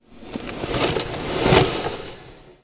Mechanical Time Spell
Magical chrono magic. Reverse, Freeverb & Paulstretch in Audacity. Sound used:
cast, caster, game-design, game-sound, magic, magician, spell, time-warp, warp